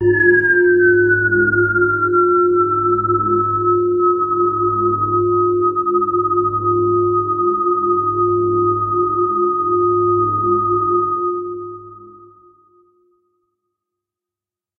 High Resonance Patch - G#2
This is a sample from my Q Rack hardware synth. It is part of the "Q multi 006: High Resonance Patch" sample pack. The sound is on the key in the name of the file. To create this samples both filters had high resonance settings, so both filters go into self oscillation.